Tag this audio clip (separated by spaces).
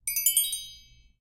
xylophone bells percussion instrument